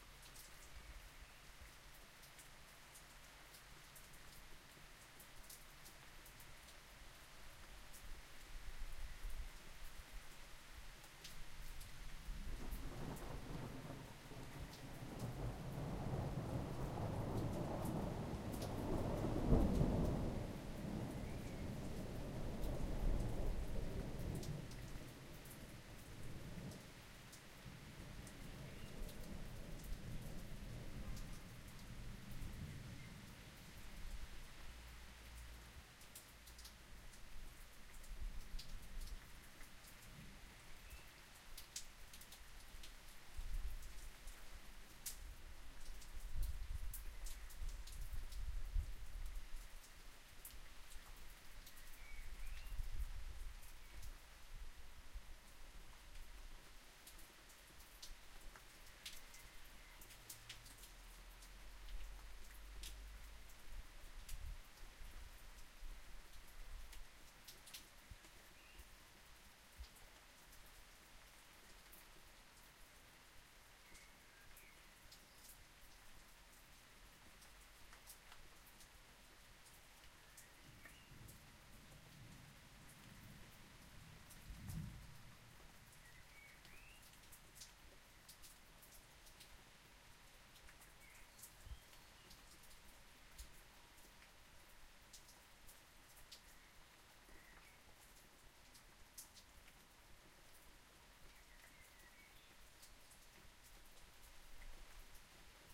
thunderstorm recorded in my back garden, evening late spring. Zoom H1.
field-recording, lightning, nature, rain, rumble, storm, thunder, thunderclap, thunder-storm, thunderstorm, weather, wind